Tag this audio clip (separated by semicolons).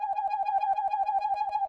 processed; sax; ufo